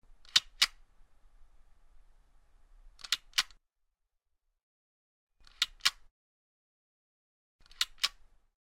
A hole puncher punches holes.
punching, punch, puncher, hole, office